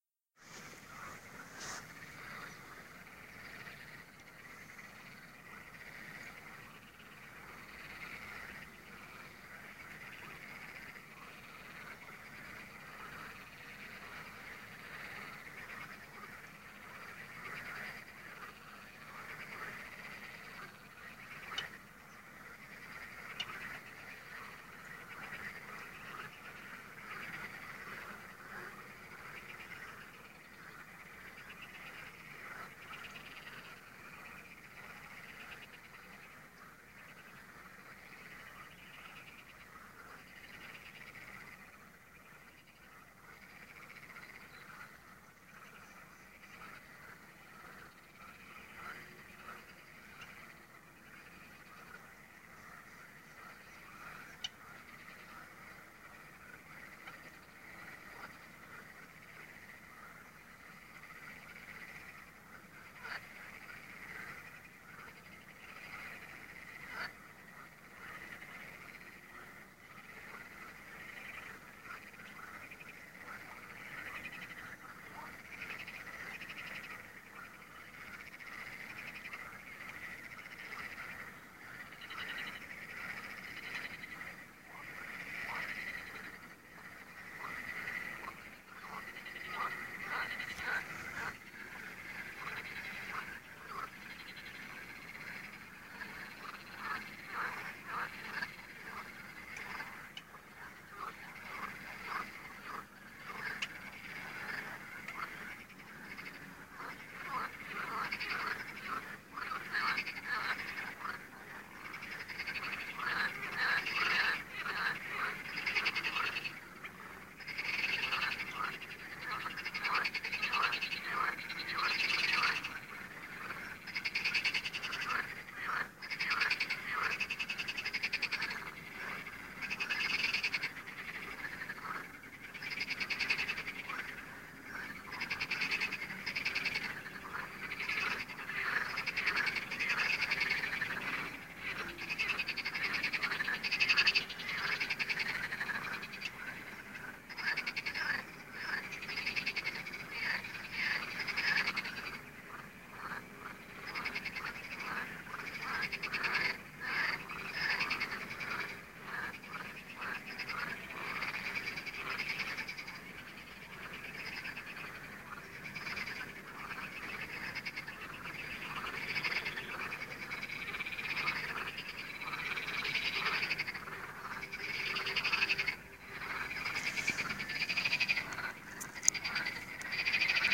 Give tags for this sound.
croaking; frogs; pond

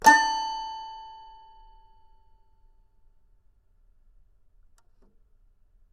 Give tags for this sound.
instrument; instruments; sample; studio; toy; toypiano; toys

